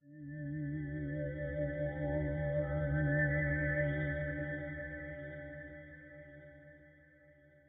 Steel Glass
A pad made with Octopus AU.
dminor, fx, major, pad